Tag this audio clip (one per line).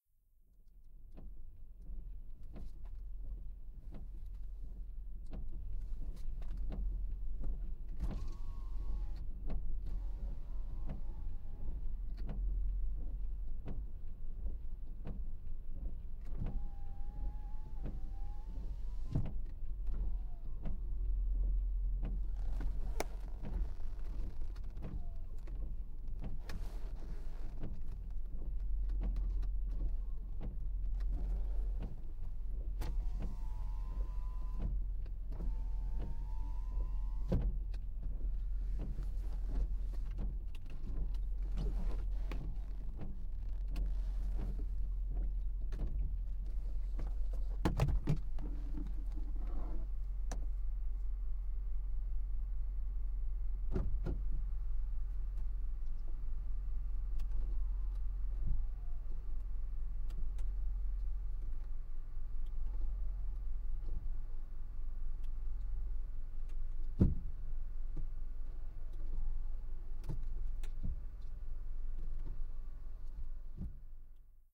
auto
Bformat
car
driving
interior
windshield
wipers